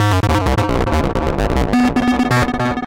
These short noise loops were made with a free buggy TB-303 emulator VST.